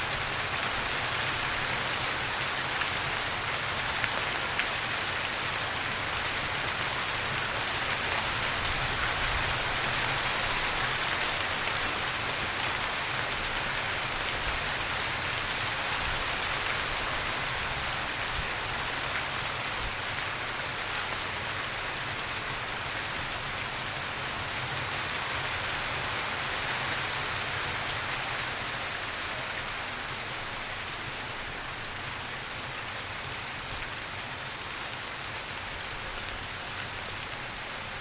Recorded with a Samson Q1U
Heavy rain sounds during spring.
FX, Storm, Weather